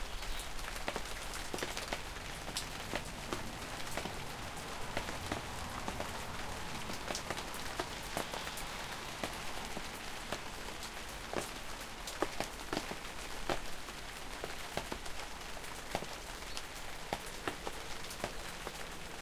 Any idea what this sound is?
Sound of dripping rain recorded in nearfield.
dripping, drops, light